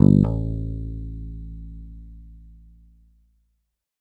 First octave note.